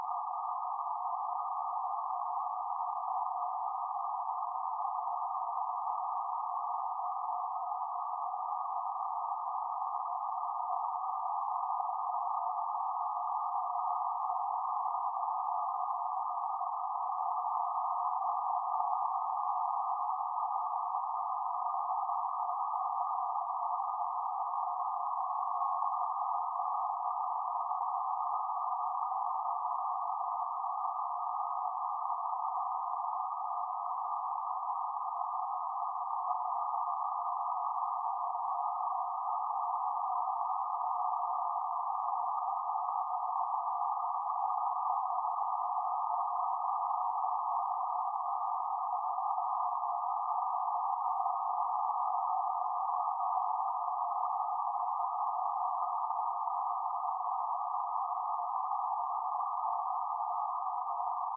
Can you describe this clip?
dark ambient high pitch tone scifi
pitch, scifi, processed